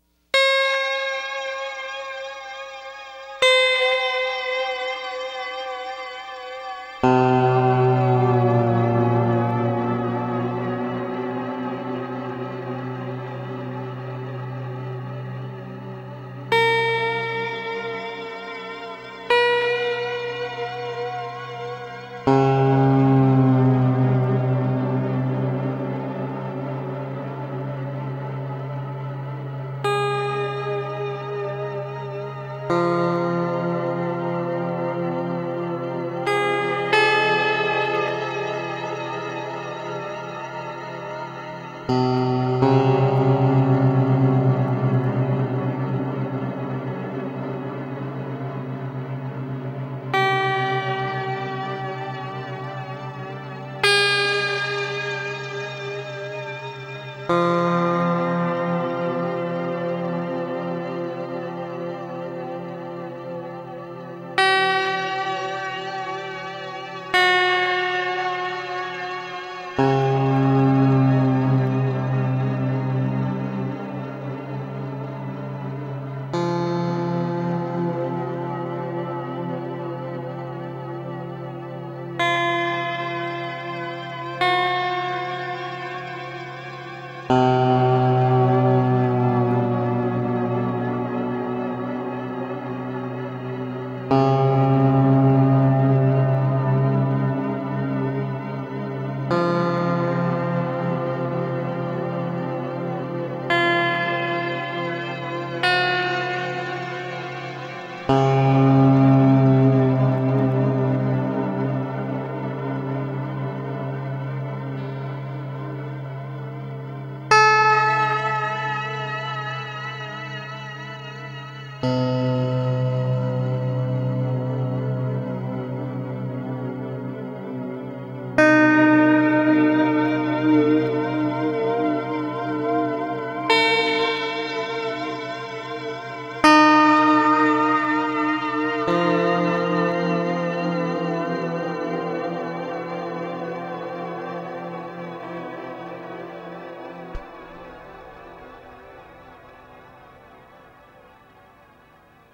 astral-destiny-cosmos
Sound Recorded on the Fender Squier Strat Guitar Using the Astral Destiny Guitar Pedal With the Cosmos Selected and Limited With a Soft Limiter in Audacity of -5dB
Cosmos, guitar-pedal, Fender, Astral-Destiny-Guitar-Pedal, Cinematic-Sound, Guitar, Squier-Strat-Guitar